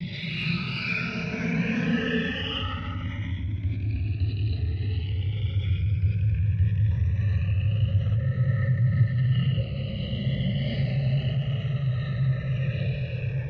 Intended for game creation: sounds of bigger and smaller spaceships and other noises very common in airless space.
How I made them:
Rubbing different things on different surfaces in front of 2 x AKG C1000S, then processing them with the free Kjearhus plugins and some guitaramp simulators.